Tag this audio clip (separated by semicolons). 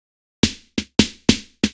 hiphop; rap; snare